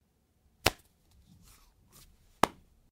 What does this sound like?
HANDSHAKE FocusFox
foley, palm